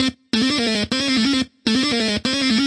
180 Krunchy Osc Synth 04
bertilled massive synths
180, bertill, free, synth